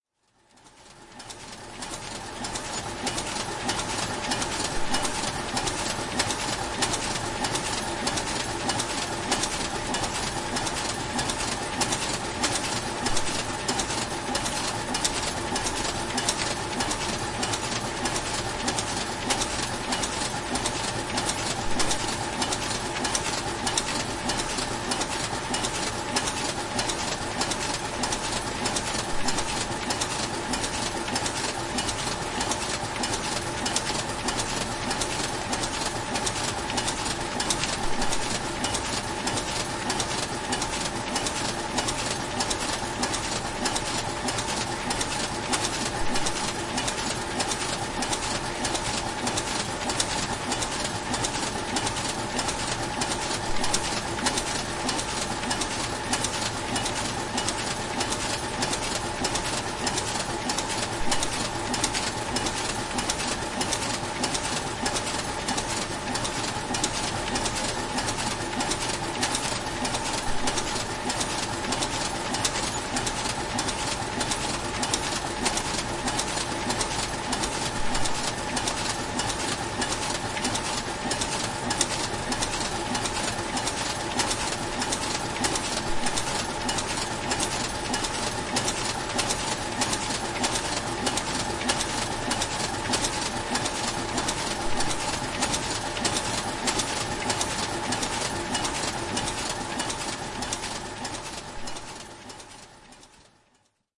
Print Shop Folder
folder, machine, printer, industrial, shop, print, servo, mechanical, machinery, paper